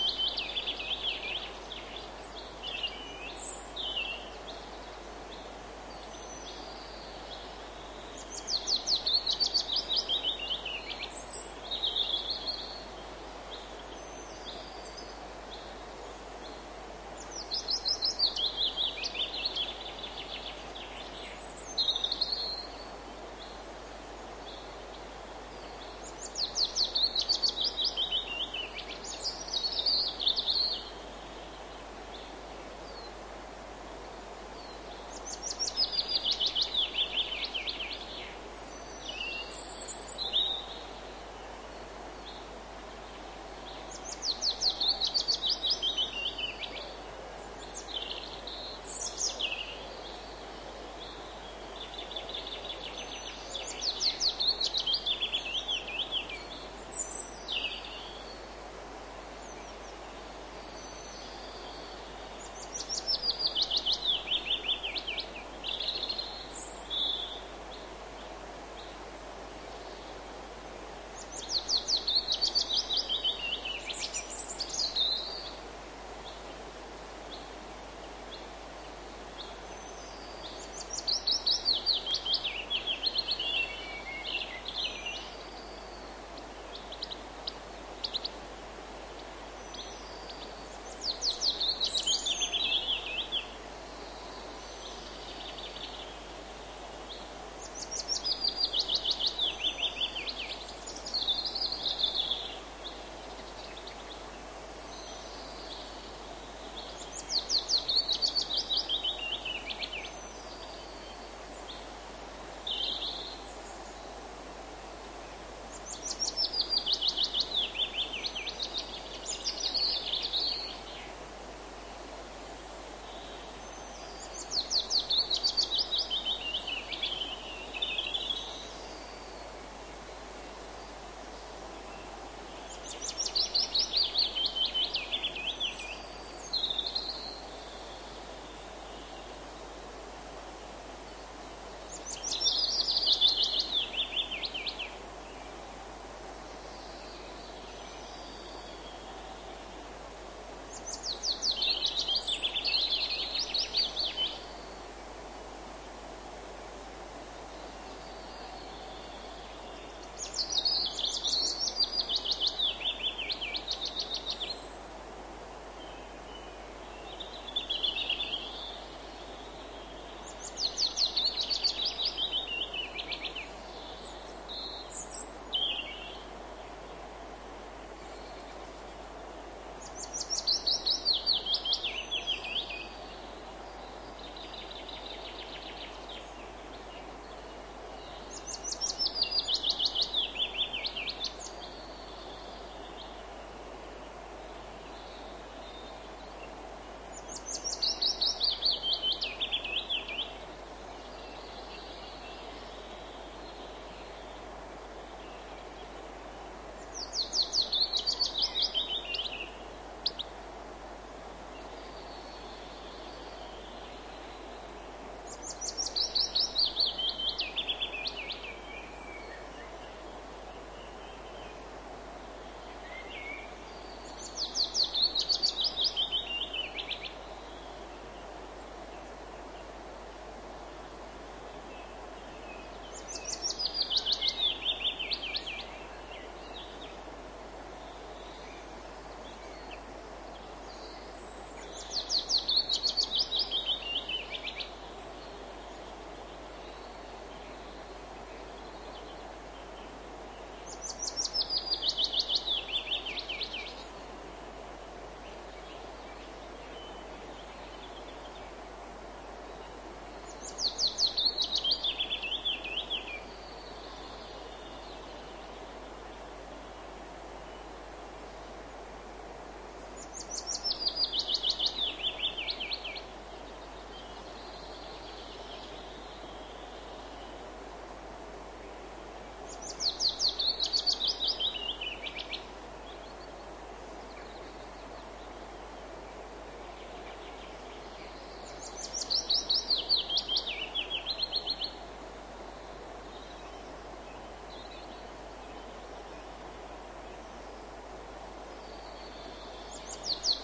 scottish morning 13
This recording was done on the 31st of May 1999 on Drummond Hill, Perthshire, Scotland, starting at 4 am, using the Sennheiser MKE 66 plus a Sony TCD-D7 DAT recorder with the SBM-1 device.
It was a sunny morning.
This is track 13.
If you download all of these tracks in the right order, you are able to burn a very relaxing CD.